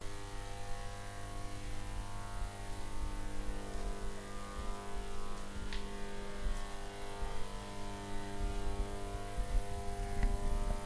neon hypnotizing
Hypnotizing sound of a neon.
electricity, field-recording, city, hypnotizing, neon, electric, sound